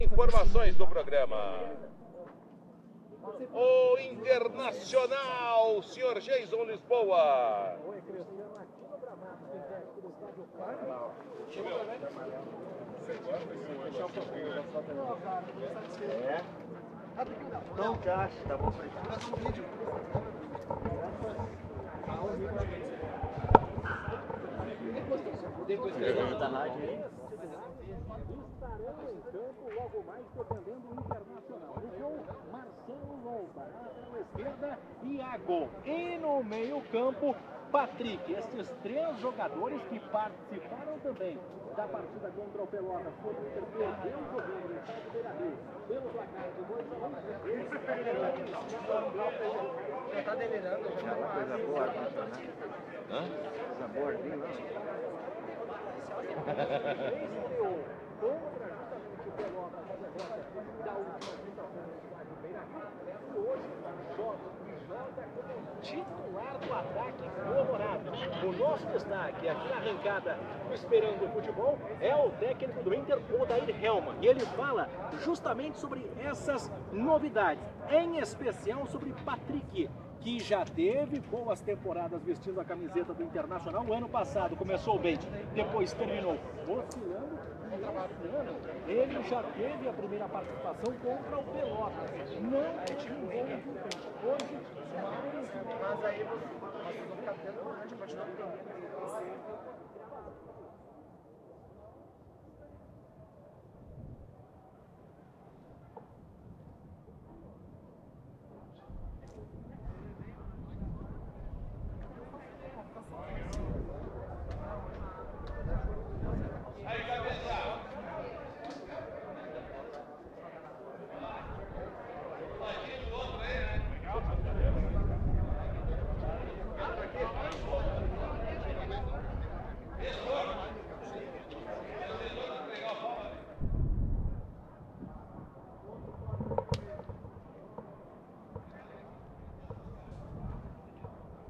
TRATADA190127 0772 ambiencia radios
Stadium Field Recording
Stadium, Recording, Field